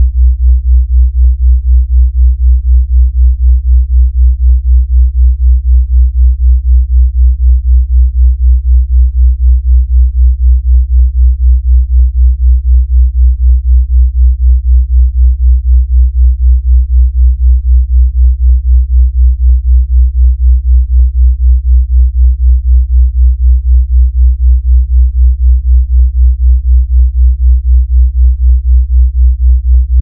This Delta Binaural beats is exactly 4Hz apart and loop perfectly at 30s. Set at the low base frequency of 60Hz and 64Hz, it's a relaxing hum.
sine, beats, tone, relax, synthesis, delta, binaural, sleep, low